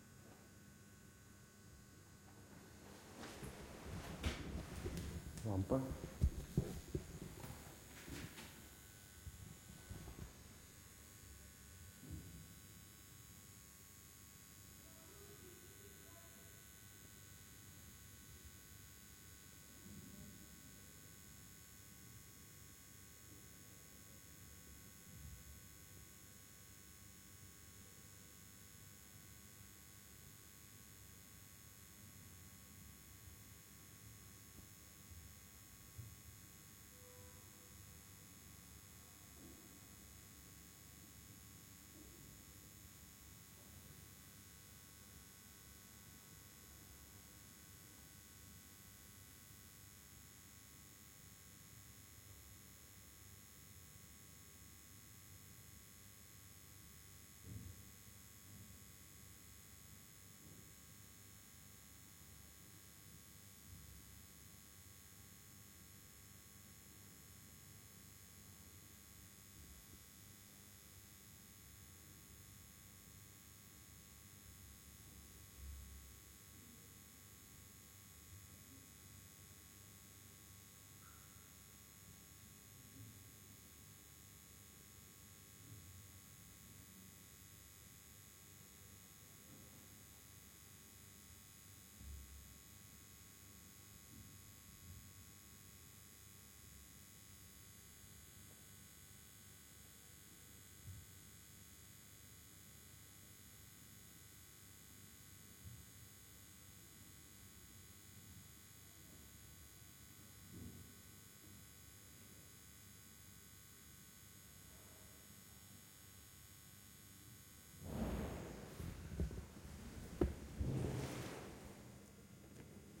Recording was made in the stairwell of nine-storey house, close to lamp.
Sound Devices 744T
2x Sanken CS-3